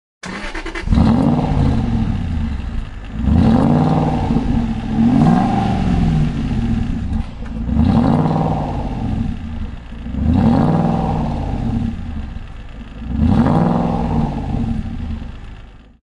Aston Exhaust
automobile, vehicle, car, engine, sports, ignition